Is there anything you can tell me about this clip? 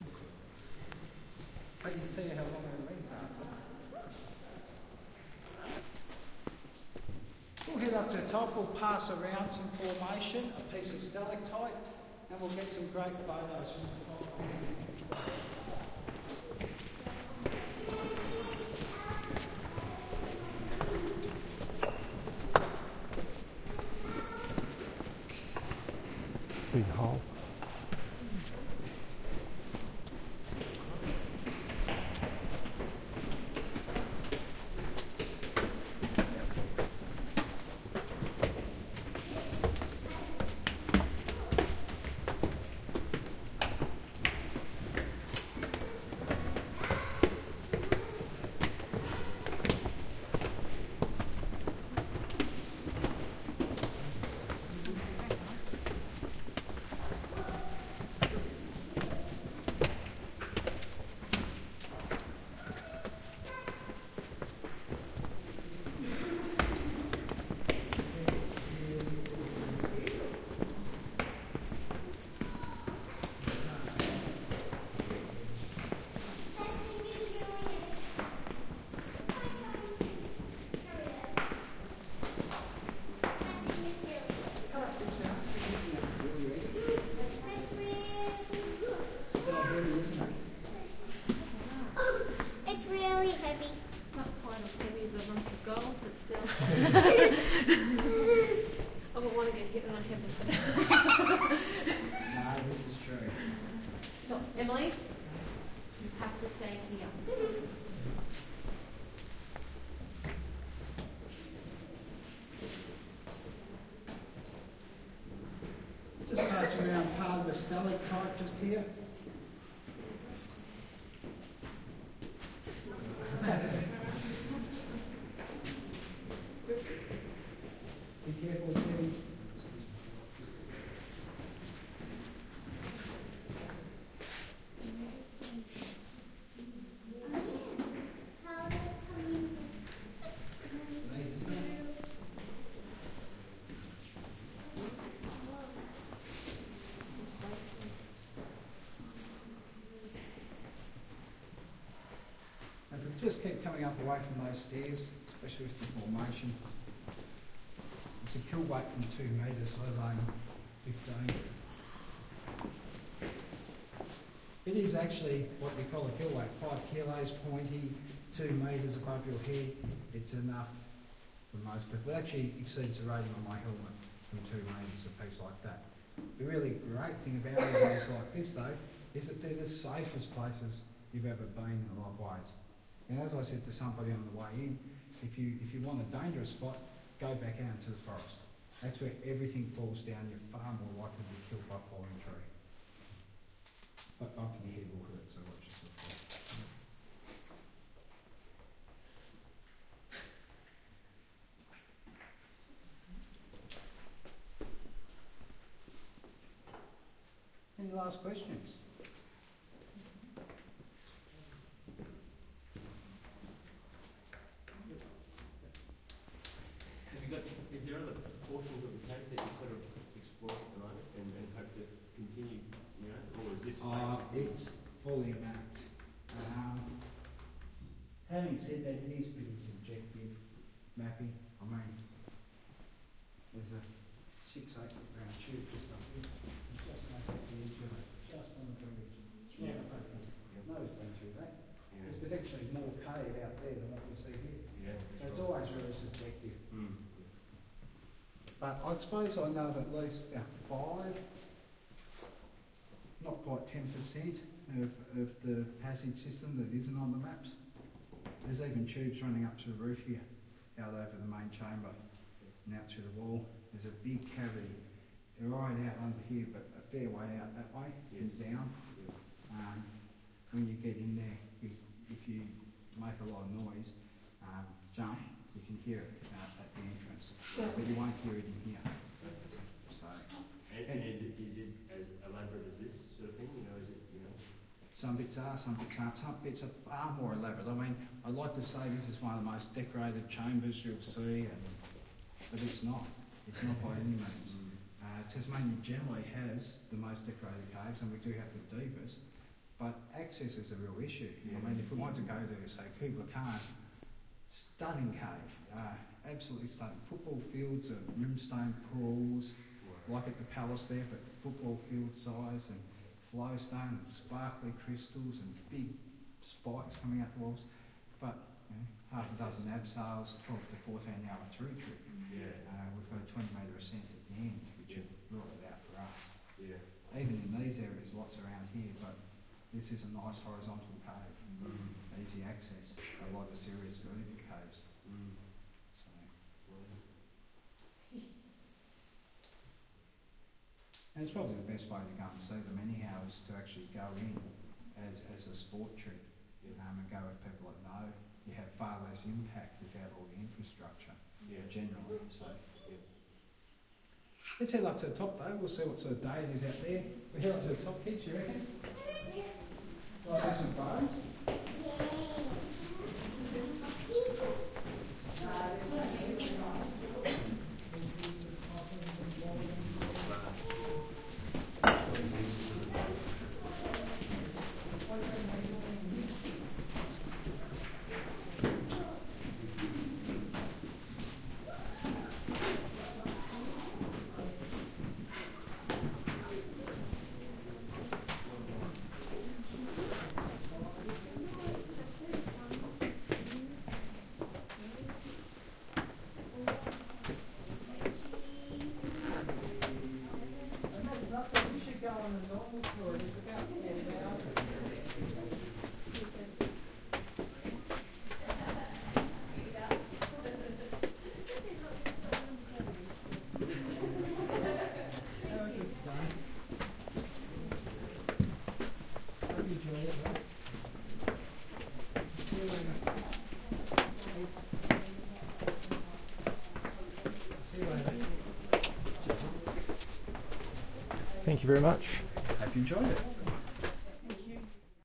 Newdegate Cave 4
In the Newdegate cave (Hastings, Tasmania). Recording chain: Panasonic WM61-A capsules (mics) - Edirol R09 (digital recorder)
binaural, cave, cave-tour, field-recording, hastings-caves, newdegate-cave, tasmania, tunnel, underground